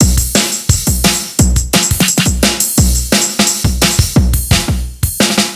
another classic break
made with a Roland MC-303 (this is not a factory pattern!)
breakbeat, jungle